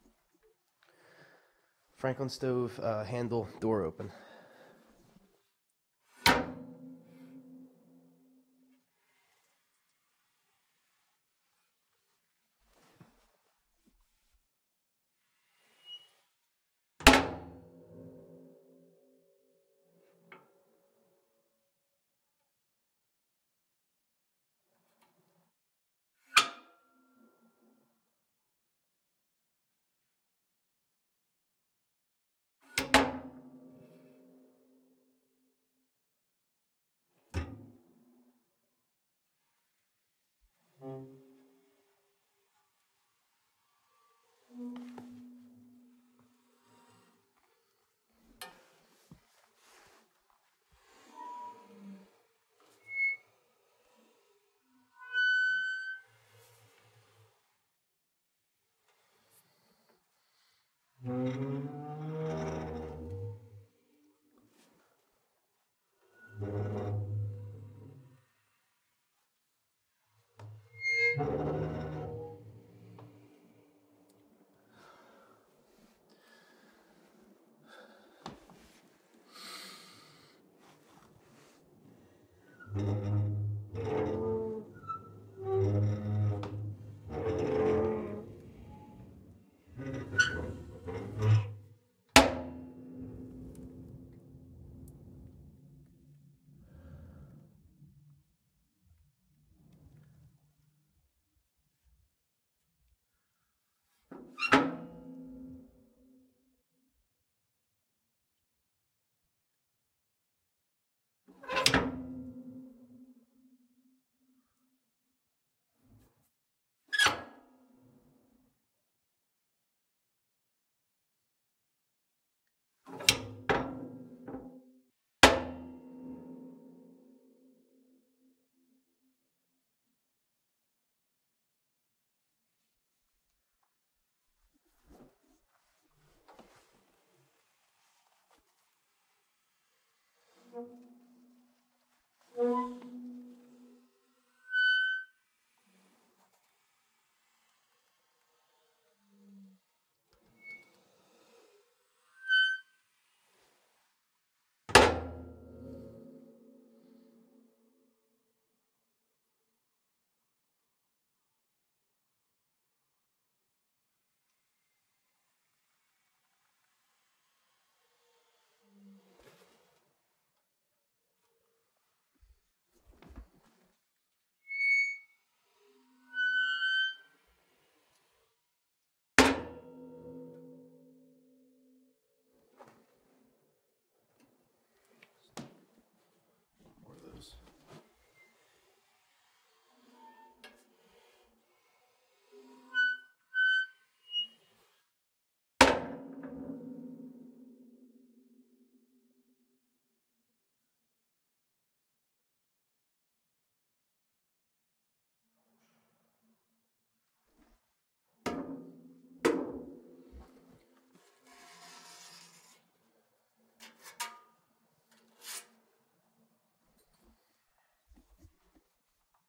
FranklinStoveDoorGroansandHits RX
Various sounds from VERY old franklin wood burning stove
groans, metallic, old, rusty, stove